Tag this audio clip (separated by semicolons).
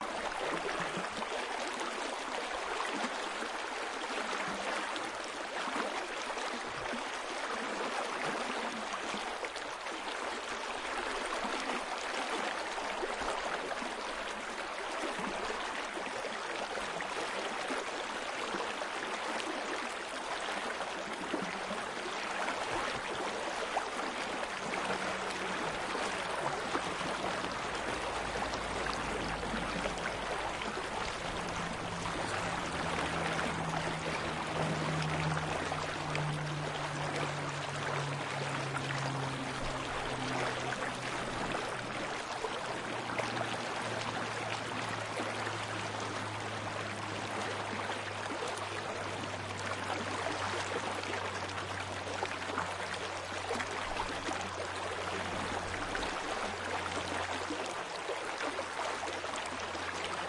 streams; water; recordings